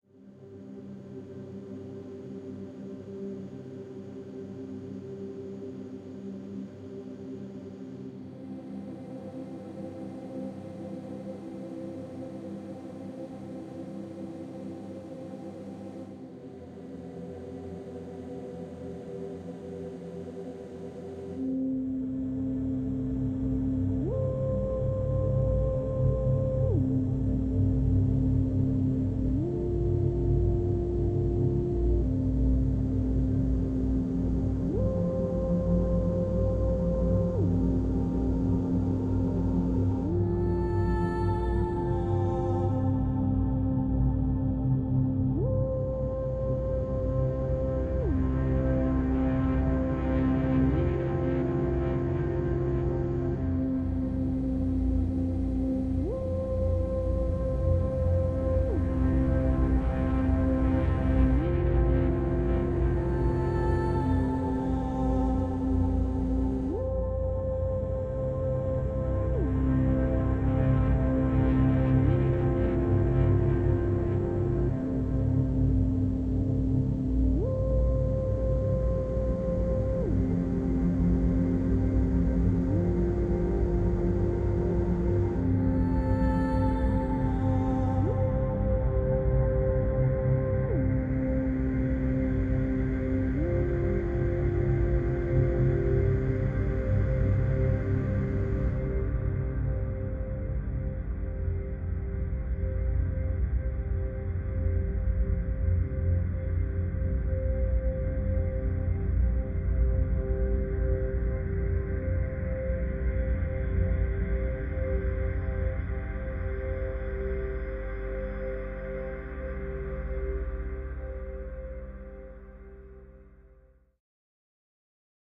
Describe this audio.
Rogue Planet (mystery ambient)
Pure pad-driven ambient score for extraterrestrial sights and wonders
dark, space, drone, underscore, suspense, atmosphere, ambient, downtempo, instrumental, sci-fi, pad, mysterious, mystery, chillout, soundtrack, music, atmospheric, cinematic, ambience, relaxing